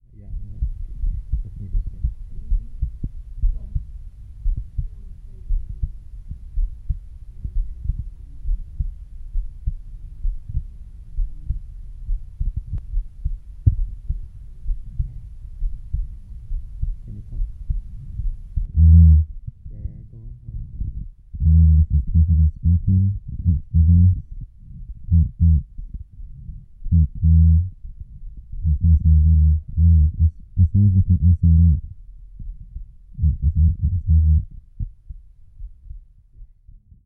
A fainted recording of an hearbeat using a DIY piezzo mic.